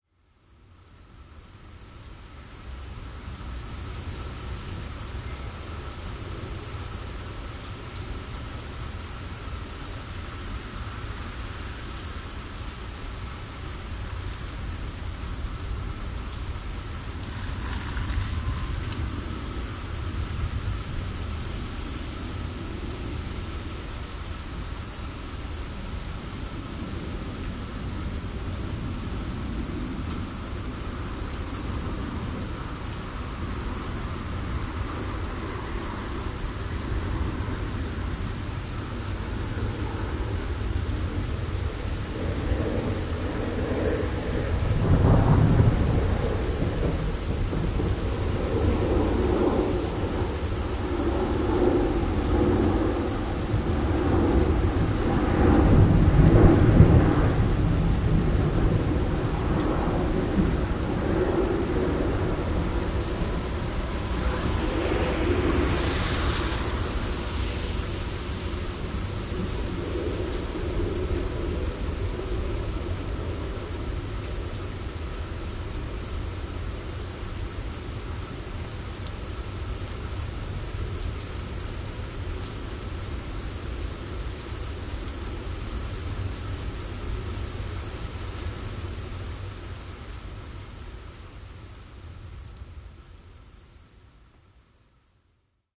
Rain-Thunder-Airplane-Car
This is a rainy afternoon with thunders, an airplane about to land at the airport and a car passing by (Binaural)
airplane, binaural, car, rain, thunder